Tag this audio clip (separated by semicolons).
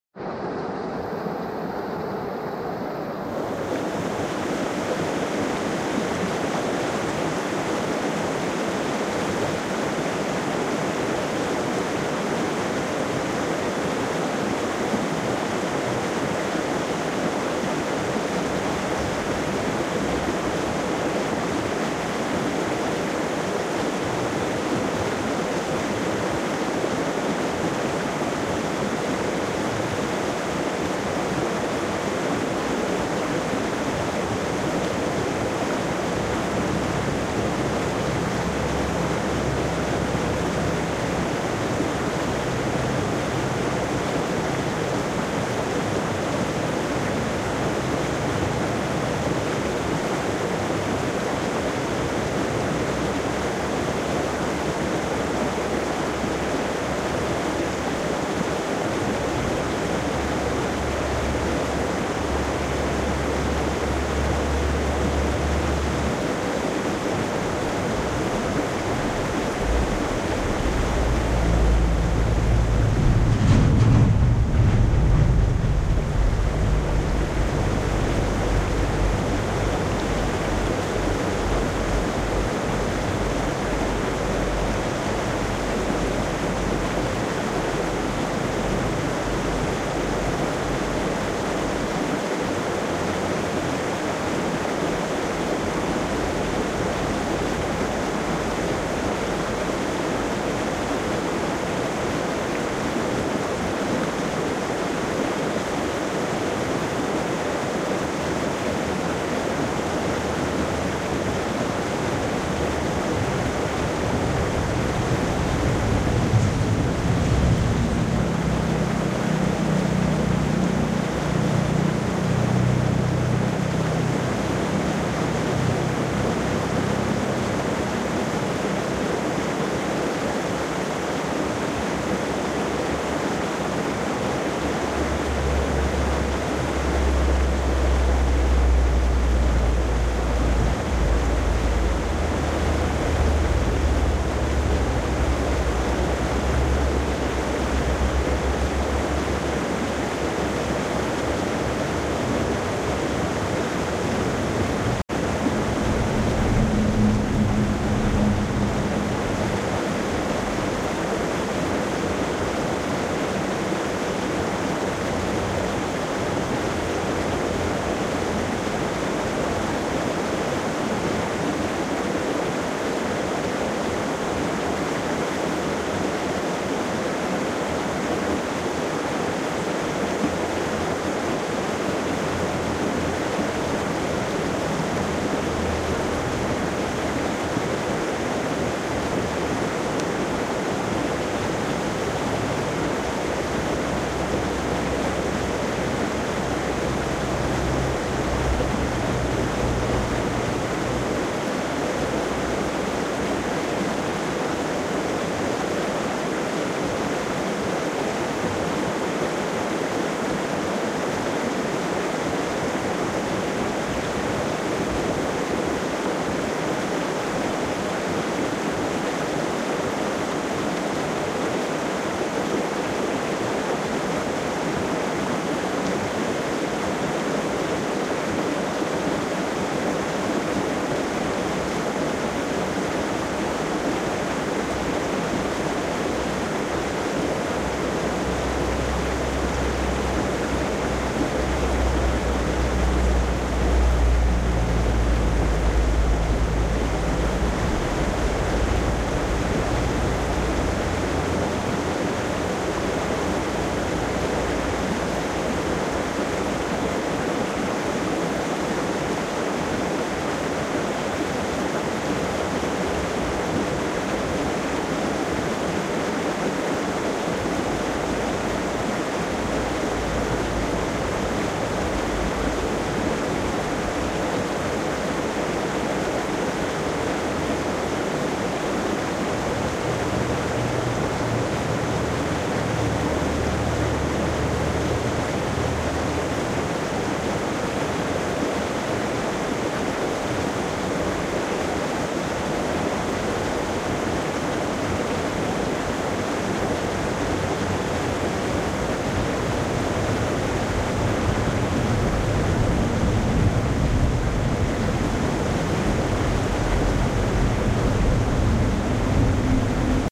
waterfall; nature; sound; water; natural; relaxing